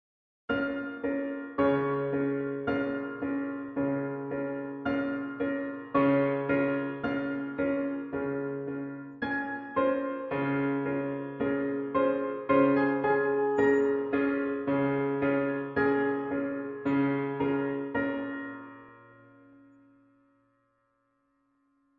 Suspenseful Piano Staccato
A Suspenseful Piano Music Loop
BPM - 110
Key - Bb Minor
Feedback welcome